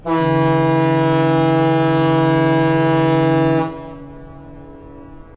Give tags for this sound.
siren ships-siren fieldrecording ships-horn ringtone honk noise horn

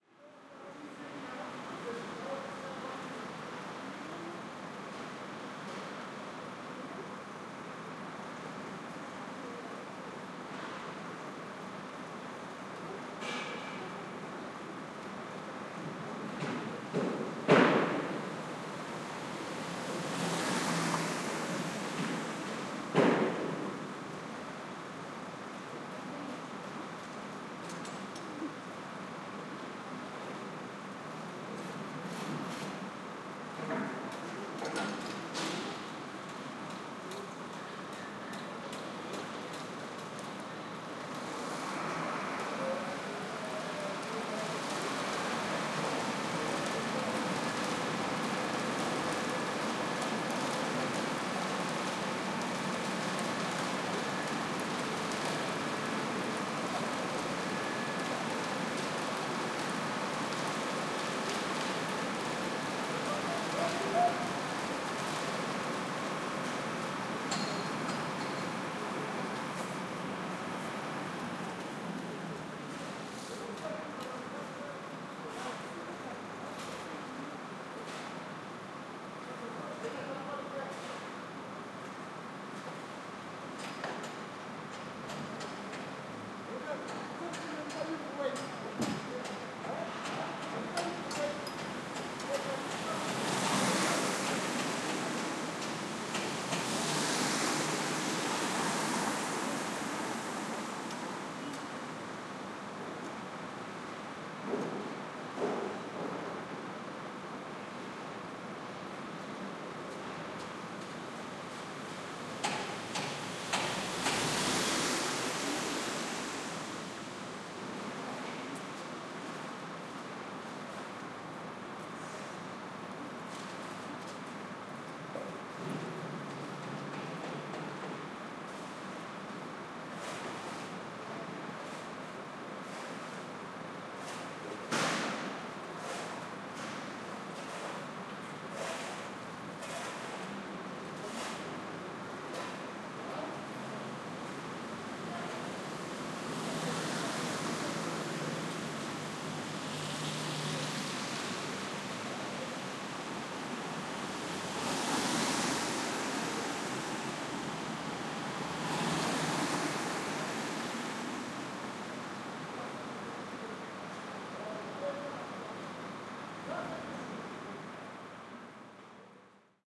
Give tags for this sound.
building car field truck

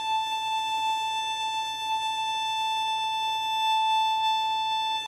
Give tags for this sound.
violin squeak high long shrill sustain pitched note

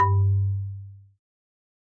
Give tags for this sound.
wood percussion

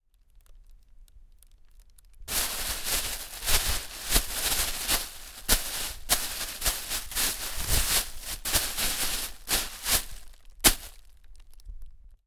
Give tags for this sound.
celophane hit bag impact

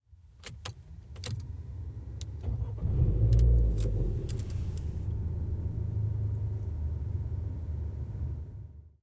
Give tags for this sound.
car duster engine keys renault

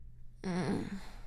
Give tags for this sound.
morning voice-acting waking yawn

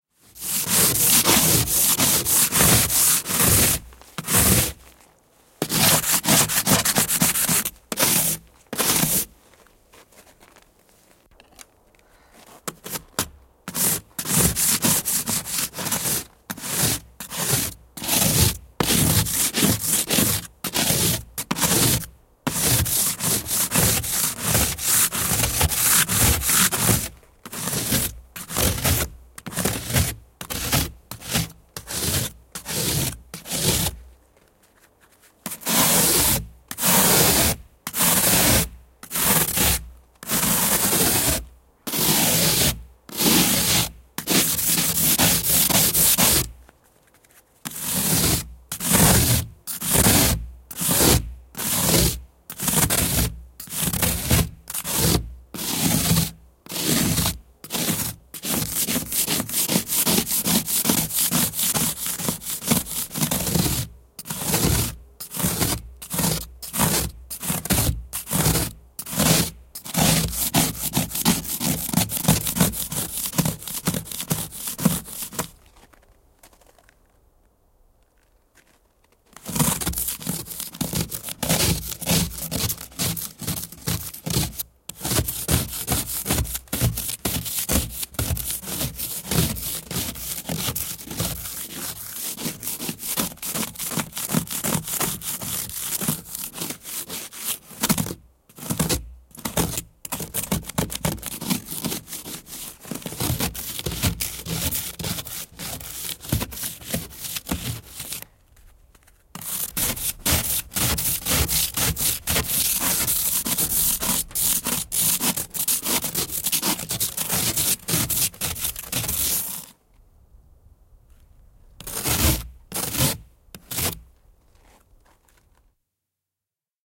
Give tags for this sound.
Auto Autoilu Autot Cars Field-Recording Finland Finnish-Broadcasting-Company Motoring Raappa Soundfx Suomi Talvi Tehosteet Winter Yle Yleisradio

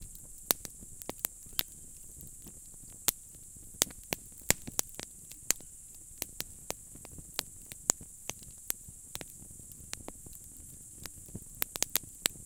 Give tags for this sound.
fireplace fire burn

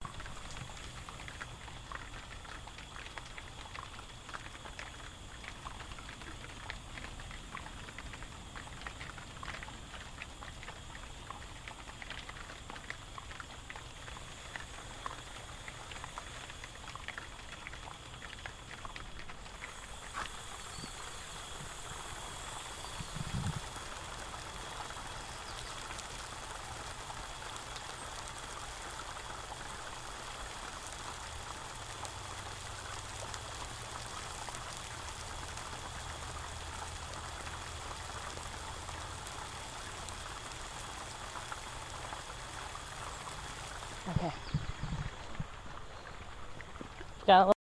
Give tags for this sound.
ambient field-recording greenhouse nature rain water watering wet

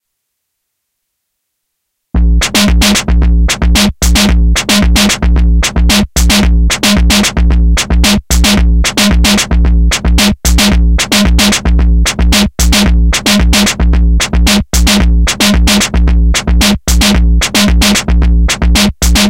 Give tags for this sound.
beat Distorted drums electronic heavy tube

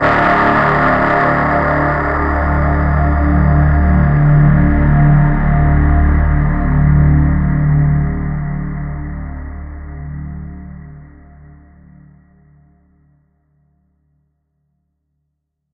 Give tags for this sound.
ambient dark digital effect evil evolving film fx sample sci-fi scoring sfx sound-design synth vintage

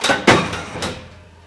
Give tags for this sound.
die factory field-recording industrial machine metal processing

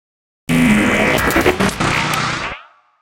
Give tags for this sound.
droid Transformer alien machine mechanical automation bionic spaceship cyborg galaxy robot android robotic

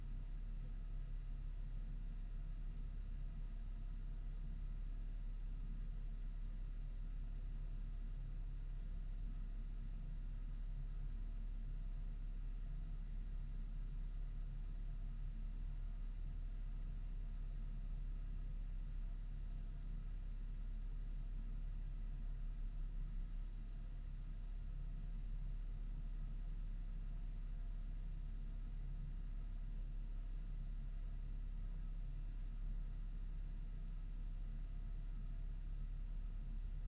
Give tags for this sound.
cellar room ambience heating tone boiler

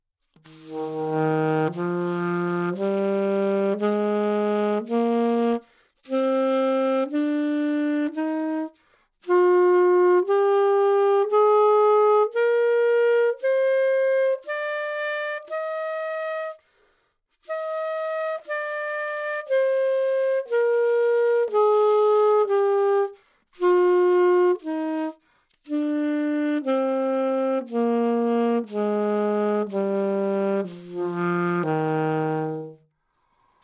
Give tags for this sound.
alto DsharpMajor good-sounds neumann-U87 sax scale

